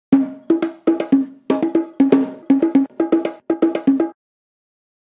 JV bongo loops for ya 1!
Recorded with various dynamic mic (mostly 421 and sm58 with no head basket)
bongo, tribal, loops, Unorthodox, samples, congatronics